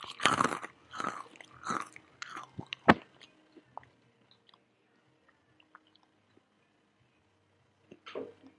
Eating a Cracker in the Kitchen